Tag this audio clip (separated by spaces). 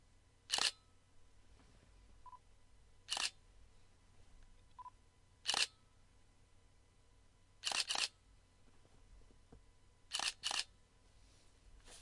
camera click dslr focus nikon photography shutter